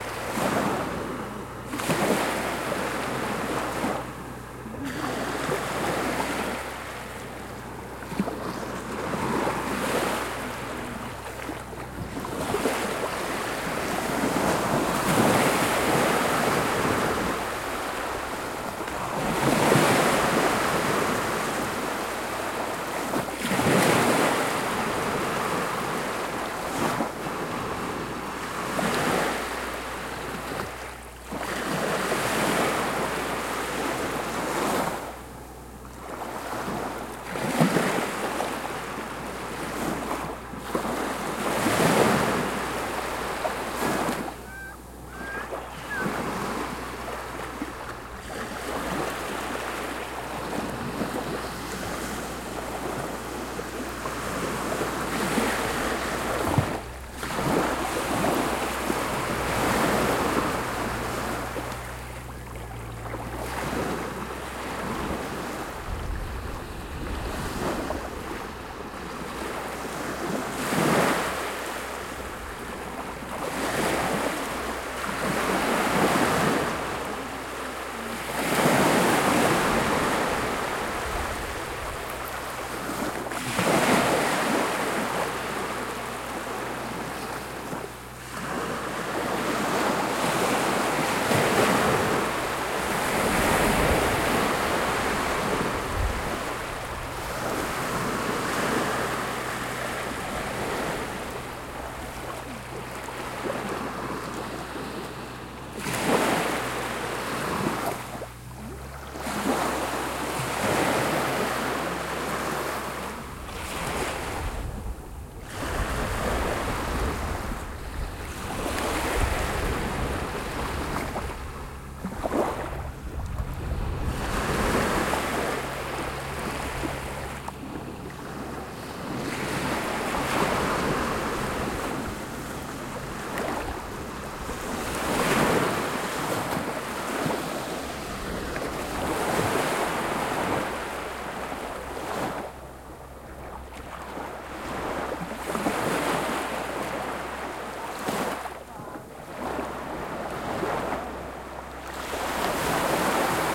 AMB Gerakas Beach Zakynthos
Seashore ambience. Medium ocenwaves.
Voices and motor boats in distance.